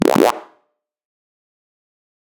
UI button1
game button ui menu click option select switch interface